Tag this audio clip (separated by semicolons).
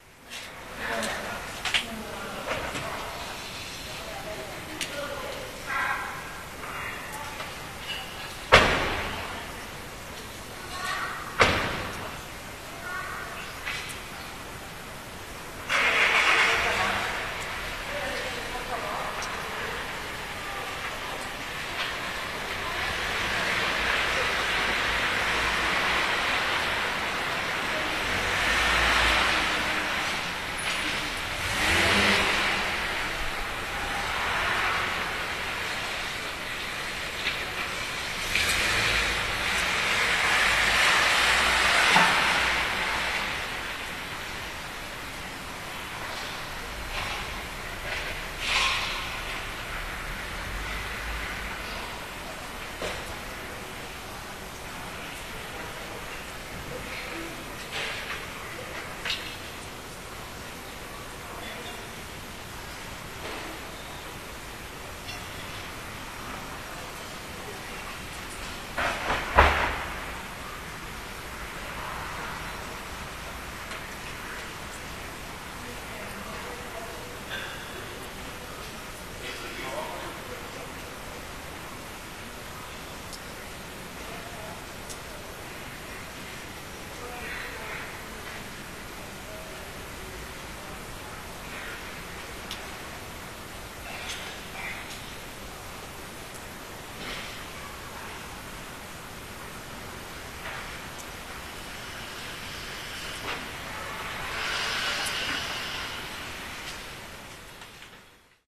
courtyard; ambience; engine; people; field-recording; poznan; car; poland; voices